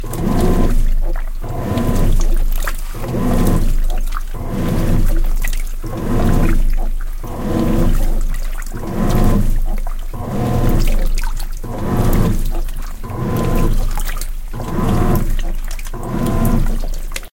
Washing machine1
bathroom, clothes, laundry, machine, wash, washer, washing, washing-machine, water